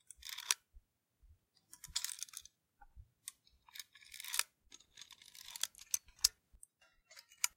Gun Foley created from a stapler.
clip, stapler, reload, gun, Weapon, foley, pistol, slide, Rifle, cock, Firearm, staple